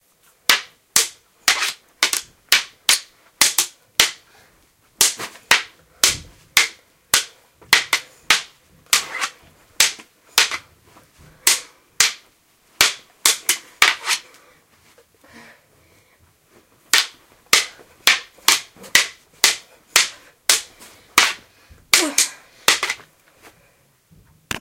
Training Swords Swordfight
Recorded with a black Sony digital IC voice recorder.